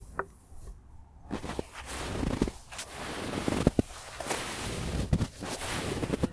Footsteps in snow
Stepping in snow.
ice, snow, walk, leaves